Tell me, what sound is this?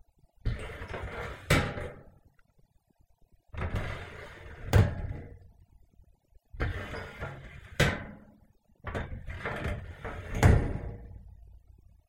Opening and closing metal filing cabinet sliding cabinet door
Sliding open and close a metal filing cabinet sliding door.
filing, metal